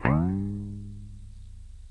experimenting with a broken guitar string. a low, bass-like, slightly warbling note.
broken, note, squirrelly, low, string, warble, plucked, experimental, bass, pluck, guitar